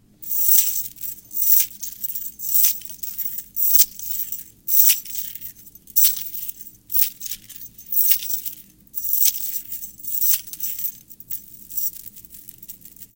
Chain Rattling
Chains rattling on the ground. Can be used for prisoners who have chains in their legs.